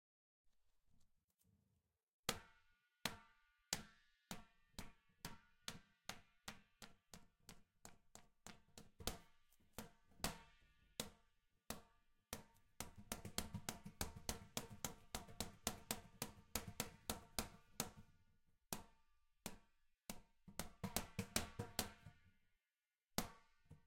An exercise ball bouncing fast on a tiled floor inside a home. recorded using a Zoom H6 portable digital recorder, X/Y microphone capsule.